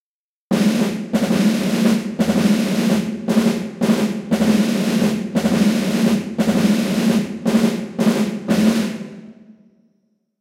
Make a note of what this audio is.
Military Snaredrum
Snare drum rolls with military attitude / marching soldiers (also loopable, respective markers within the wave file)
Created with Native Instruments Battery 4 and a lot of reverb
drum, loop, marching, military, snaredrum